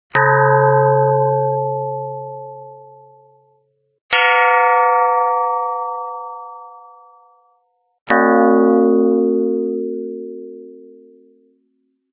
These bell-like sounds were created with the technique invented and developed in my PhD, called Histogram Mapping Synthesis (HMS). HMS is based on Cellular Automata (CA) which are mathematical/computational models that create moving images. In the context of HMS, these images are analysed by histogram measurements, giving as a result a sequence of histograms. In a nutshell, these histogram sequences are converted into spectrograms which in turn are rendered into sounds. Additional DSP methods were developed to control the CA and the synthesis so as to be able to design and produce sounds in a predictable and controllable manner.
Additive-Synthesis, algorithmic, Cellular-Automata, Dynamical-Systems, electronic, Histogram-Mapping-Synthesis, synth